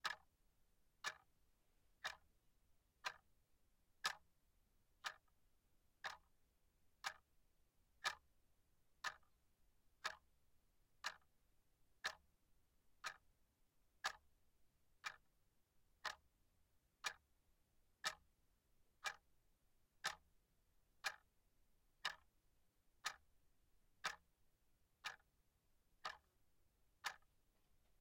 A plastic desk clock ticking away. I placed the microphone realllyyyyy ridiculously close to the clock to try and minimize room-sound, so hopefully this will help someone somewhere!
Also rendered the sample so it has the ability to loop easily (I think... To my ears and a 60bpm grid anyway). Enjoy!
Clock, Foley, Plastic, Seconds, Tick, Ticking